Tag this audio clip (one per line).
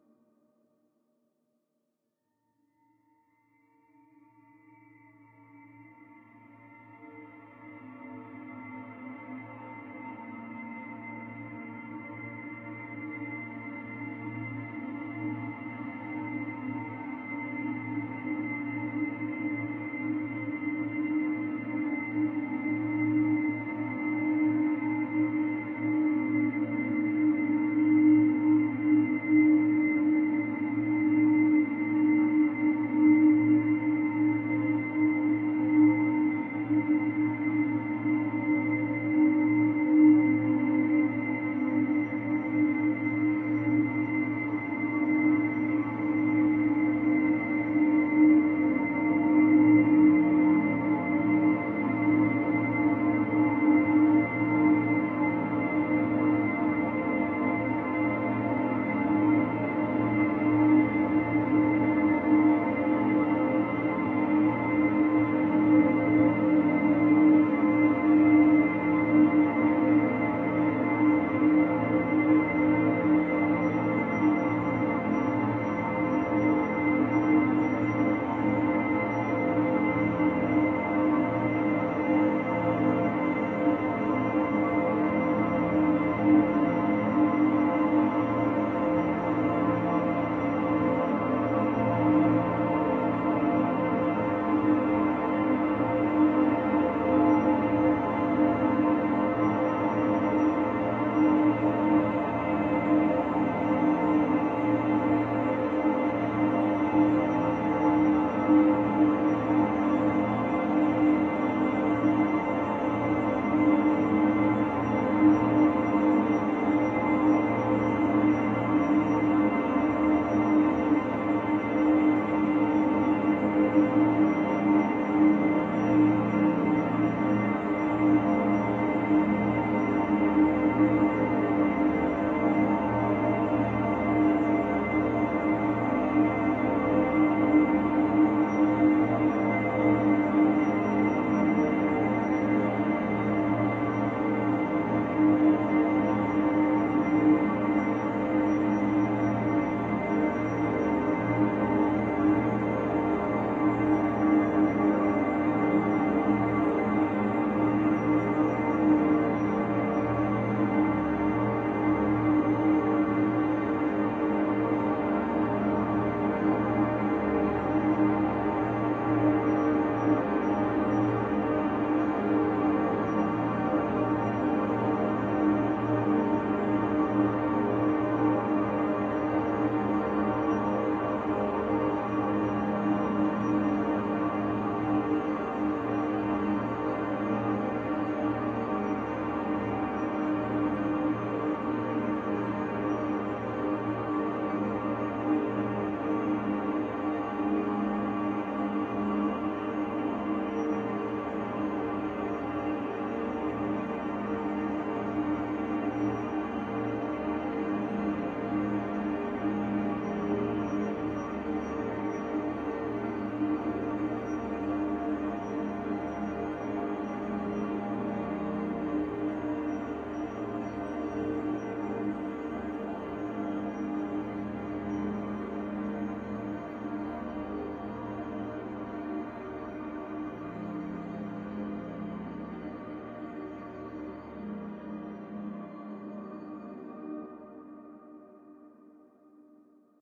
divine
dream
drone
evolving
experimental
multisample
pad
soundscape
sweet